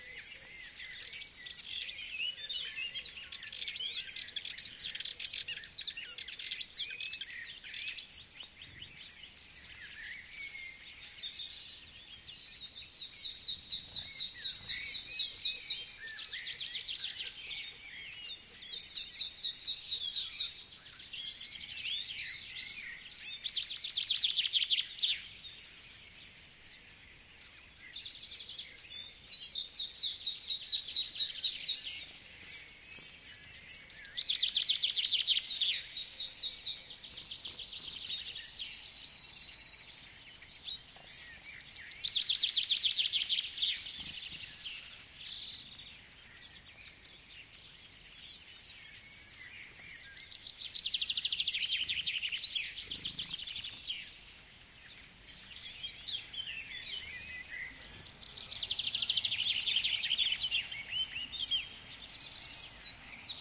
lots of birds singing early in the morning near Bernabe House (Carcabuey, Spain). Sennheiser ME66+MKH30 into Shure FP24, recorded in Edirol R09, decoded to M/S stereo with Voxengo free VST plugin
south-spain, spring, nature, field-recording, birds